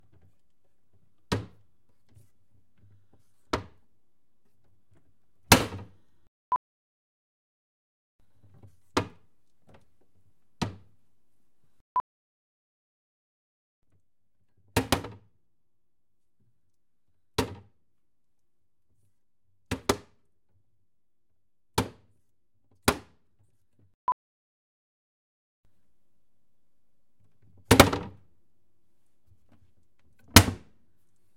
Cupboard door
catering, empty, field-recording, kitchen, sink, stereo, water